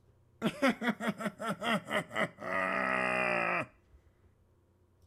Evil gnome laugh
Evil, laugh